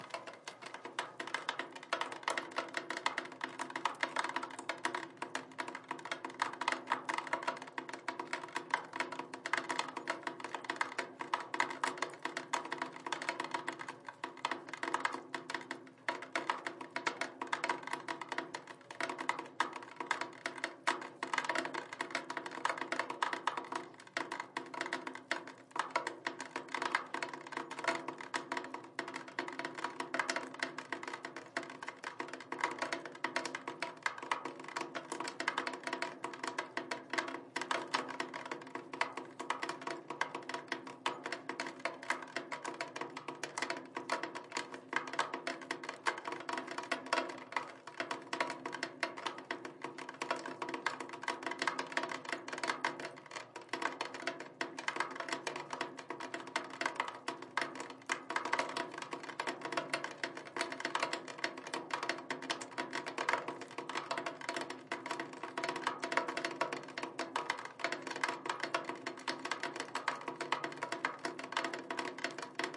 A sound of water drops in a drainpipe from melting snow, recorded with Sony PCM D100.